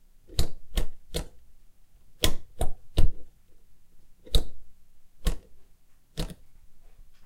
Laundry Machine Knob Turn
Switching around a clunky knob on my mom's laundry machine. Tried to keep the recording as clean as possible.